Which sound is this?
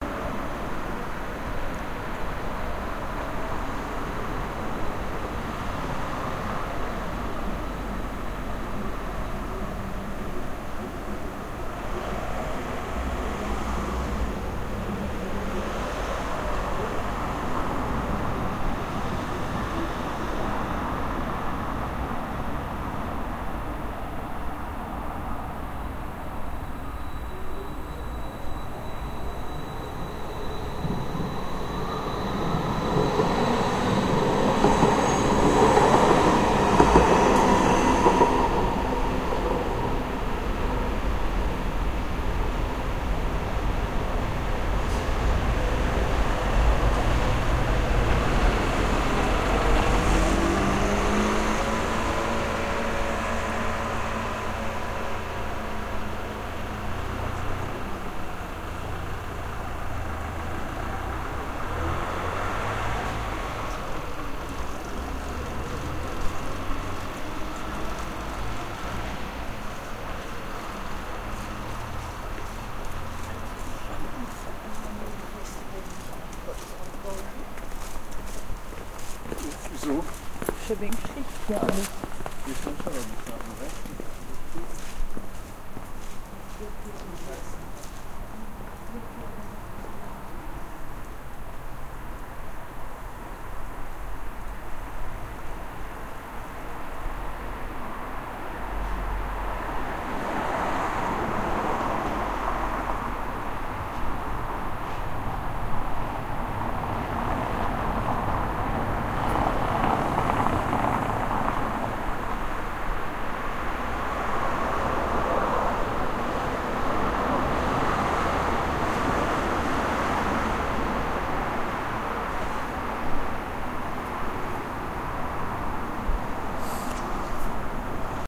Traffic Verkehr elektrotram City Car
Recorded by me at night at 22 o'clock . In Potsdam
Car, City, elektrotram, night, verkehr